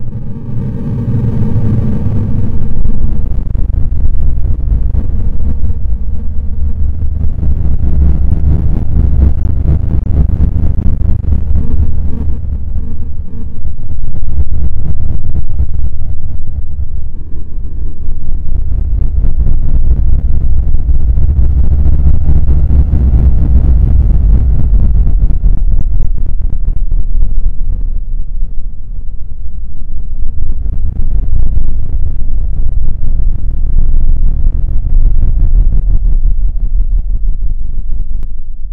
This sound is a UFO sound effect created from a waveform generator(can't remember which program I used). Nothing was thought out, sound was manipulated by ear. However, if you decide to use this in a movie, video or podcast send me a note, thx.